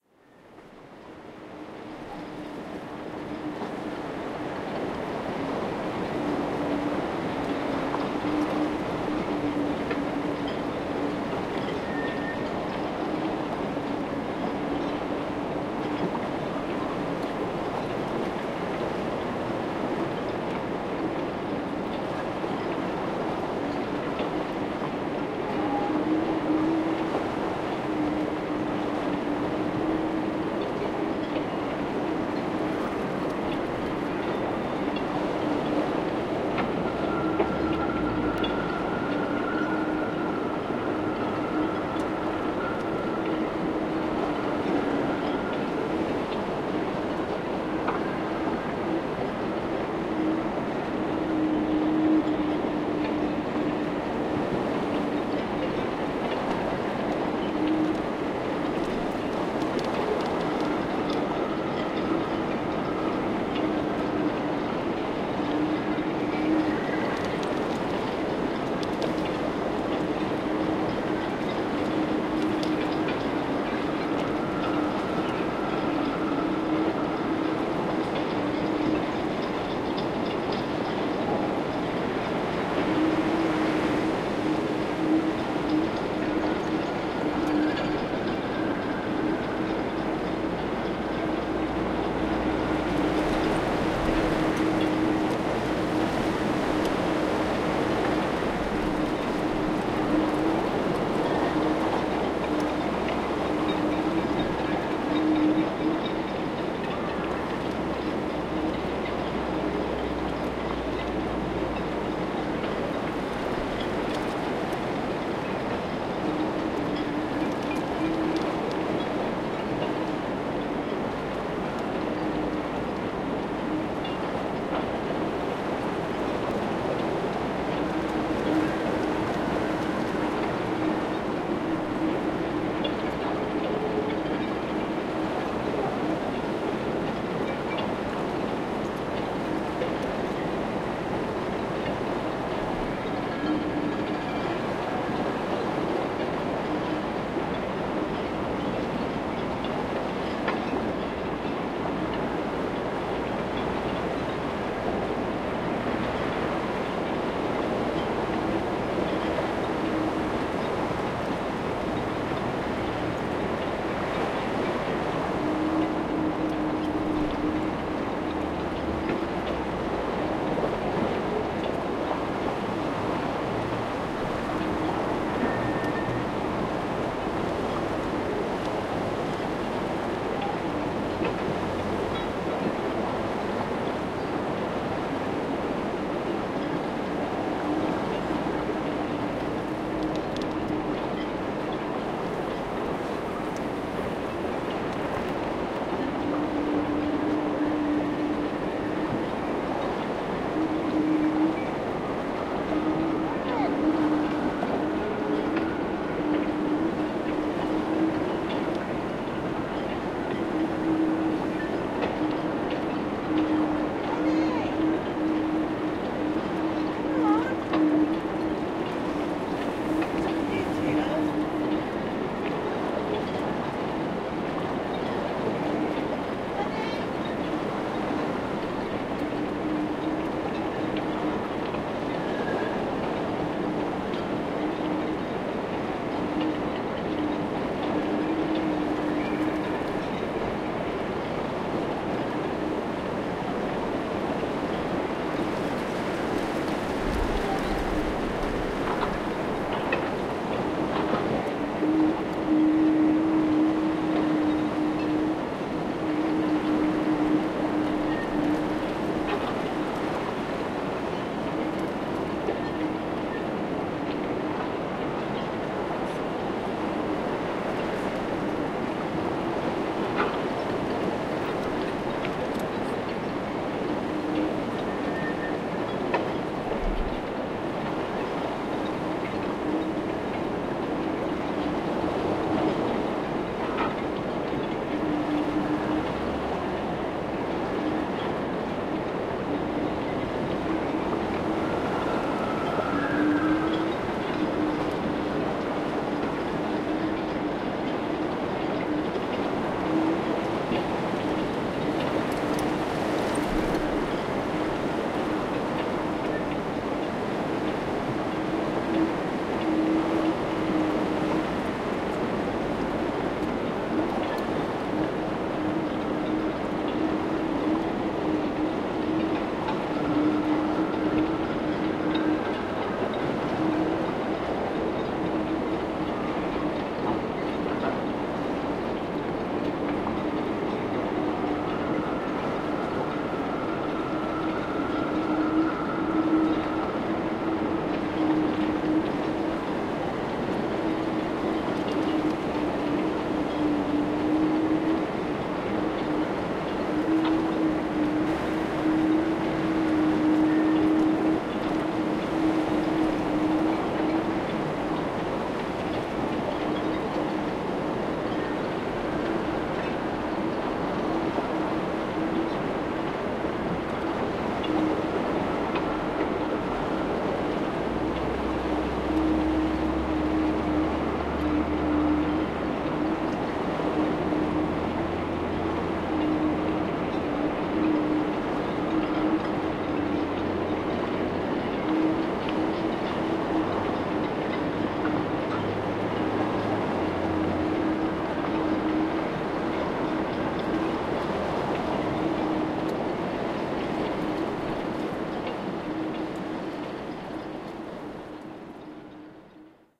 Windy-Harbor
Recording done on New Years Eve 2013/14 at boat harbour in Plymouth. You can hear wind whistling in the masts of sailing boats, splashing water and ringing of the anchor chains etc.
Recorded using Zoom H1 V2 and edited in Audacity.